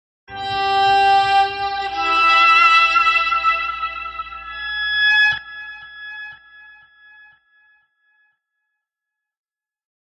guitar swell 11
guitar with octafuzz delay and volume pedal
ambient, delay, distorted